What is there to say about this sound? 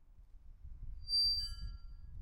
Squeaking Metal Gate Door in the Night of Berlin
Just a squeaky door.. there are no ghosts here...
dark, quietschend, ghost, nacht, jarring, squeaking, jar, squeak, night, scary, metal, door